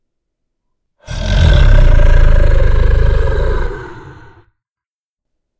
This is a "dragon growl" I made.
Enjoy!
animal, beast, creature, dragon, growl, monster, roar